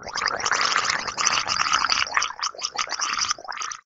Gargling water. Recorded with a CA desktop microphone.